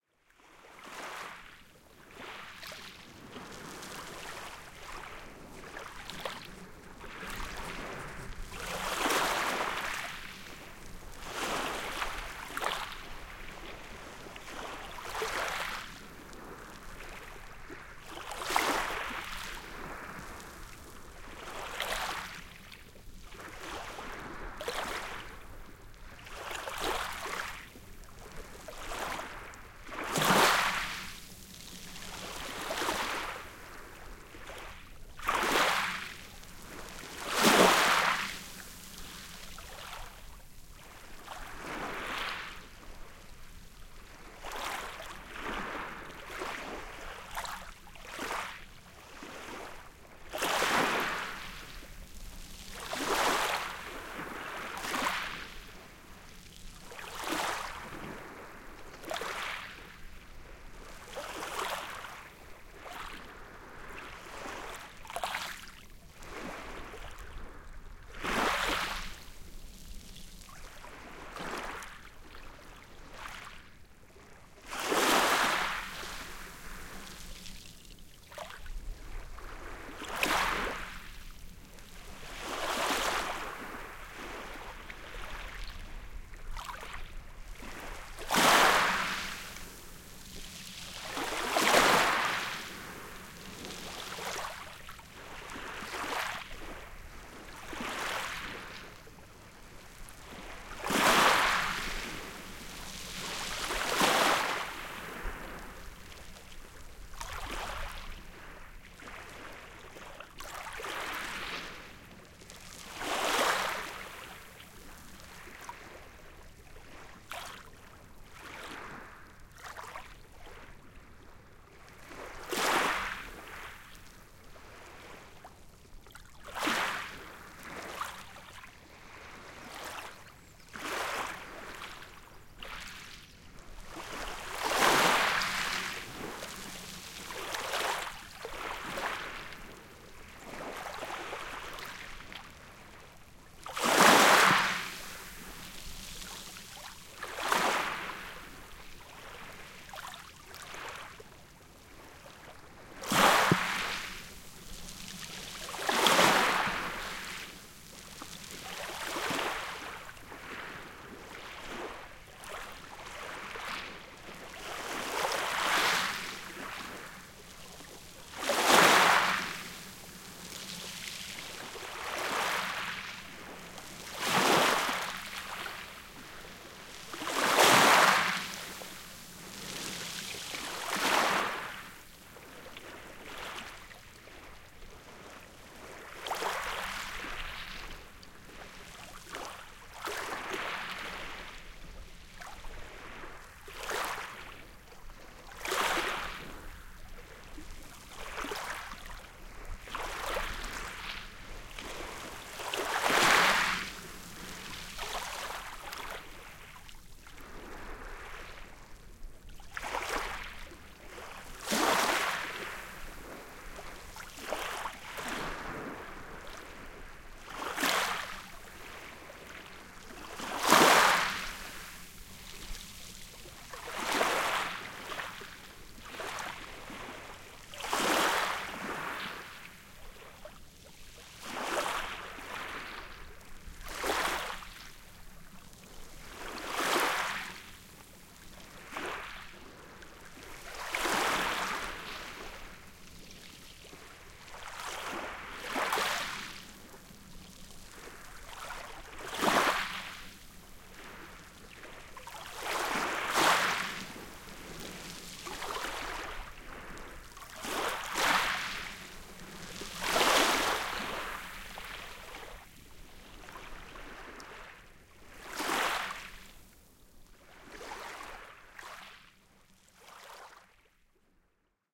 Foggy beach - gentle waves
Recorded on Zoom H2 on tripod at shoreline. Very foggy day- always lovely because it kills any distant sounds like traffic or people! Very calm, wind 1 m/s (inaudible), gentle waves washing onto the shore, some gravel sounds.
waves, field-recording, stereo, water